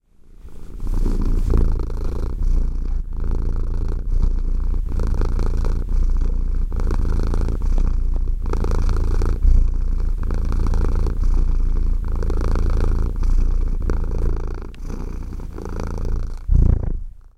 Fia Cat Purring
A stereo recording of my cat, Fia, which is purring.
black, cat, fia, purring, sound, sounds